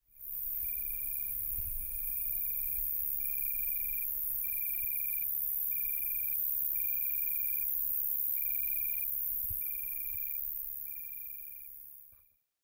Ambiance sound in a meadow by a summer night